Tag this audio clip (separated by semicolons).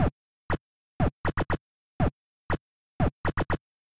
noise-music; synth-drums; loop; rhythm; 120-bpm; noise; NoizDumpster; TheLowerRhythm; VST; percussion; TLR